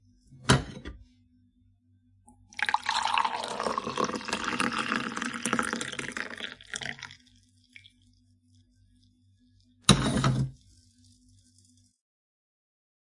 pouring coffee
Taking the coffee pot, pouring the coffee, putting the coffee pot back.
Recorded with a Zoom h1.